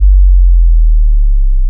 sub-bass-g1-b0
Simple beautiful sub bass, a little slide from g to b. 140 bpm, one bar in length.
A low frequency chirp generated in audacity starting at 49 and finishing at 30.87!
With the decibal set to 0. Go ahead an try loading this in and changing the decibal gain to 6. Then try changing it to -6, see how different it sounds. But you probably already knew that :)
audacity, bass, down, low, pitch-shift, power, power-down, sine, sub, sub-bass, tech, technology